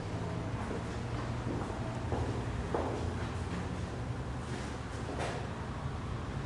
Step in Hardfloor
Suspense, Orchestral, Thriller
Thriller, Orchestral, Suspense